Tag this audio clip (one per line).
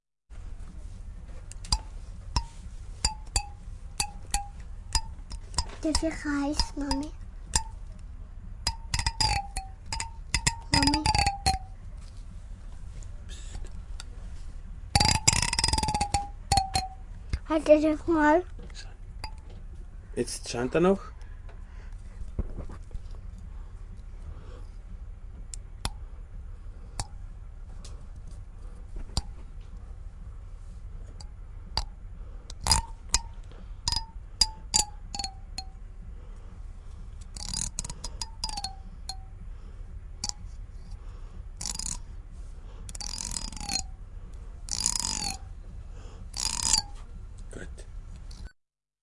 machines
street
temples
thailand